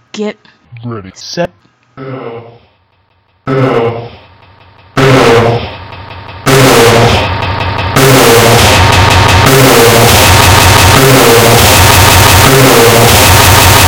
This is me making my own vocal chop. needs work but i hop you like it. :P